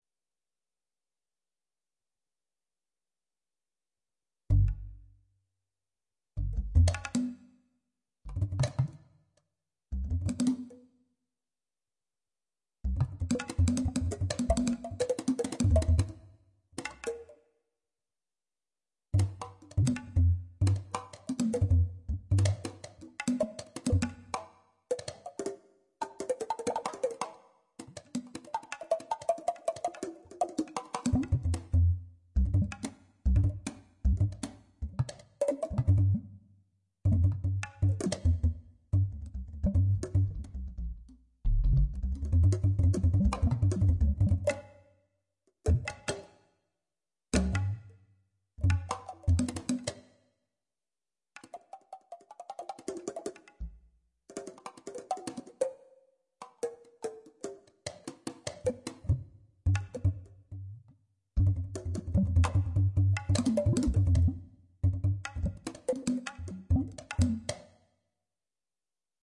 Stereo recording of a short experimental piece with bass and percussion sounds. All sounds were played on MIDI guitar via a Roland GR-33 guitar synthesizer, recorded on a Boss BR-8.